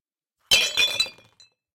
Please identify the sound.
Battle falling
Sound of falling glass battles.
battle, falling, glass